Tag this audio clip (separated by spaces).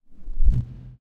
balloon low ball